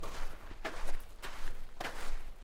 I recorded myself walking in a sandy arena.